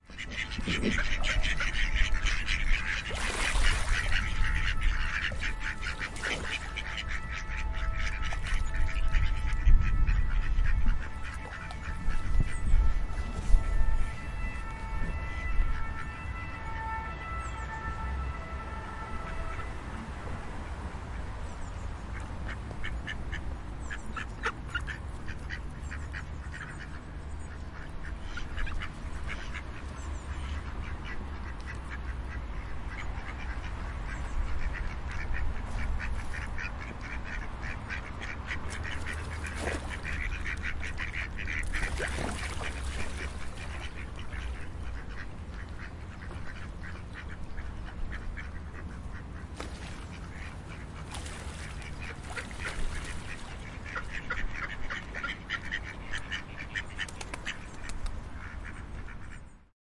Ducks and a Siren near the Seine
In Mantes-la-Jolie, next to the Seine River. Ducks quack and a siren passes on the road
quack, euro-siren, river, splash, seine, geese, ducks, siren